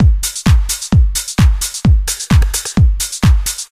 BFA Rush Kick Loop 02 130
k, solo